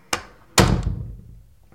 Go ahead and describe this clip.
Door - Close 03
A door close
close, closing, door, doors, open, opening